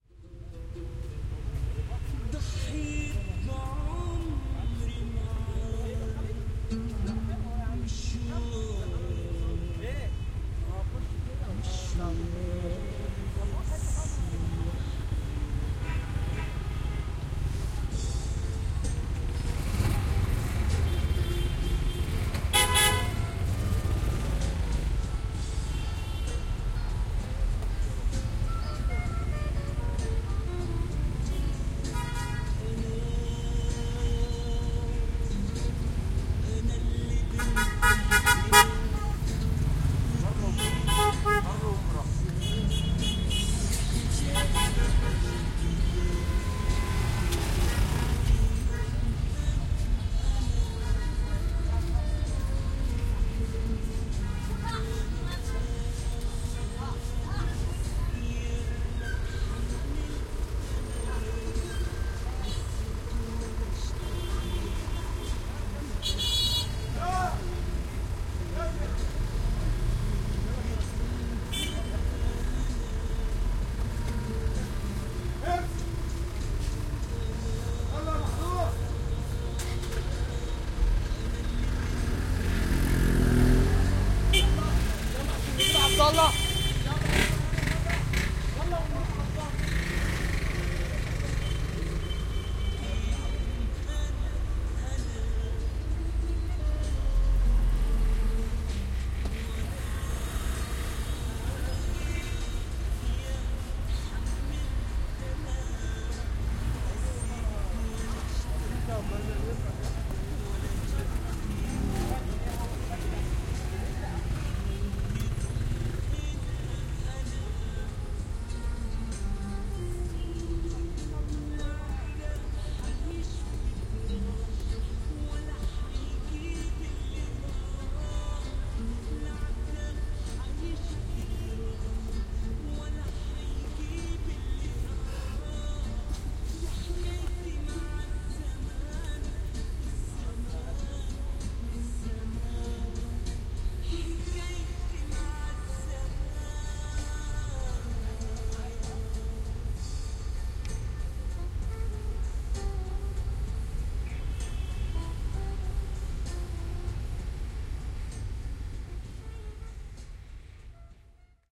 In the Taxi
2014/11/24 - Cairo, Egypt
5pm - In a Taxi.
Traffic. Drivers. Bikes. Horns.
Background music
ORTF Couple